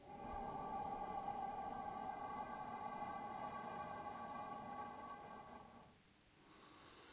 Recorded with Rode VideomicNTG. Raw sound so you can edit as you please. Me exhaling through a large tube to create a kind of weird/odd background noise.
ambiance, anxious, atmos, background-sound, drama, dramatic, phantom, suspense, thrill, weird